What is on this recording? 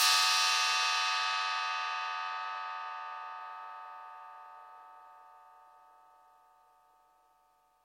Evolver cymbal 2
closed, dave, dsi, evolver, hihat, metallic, smith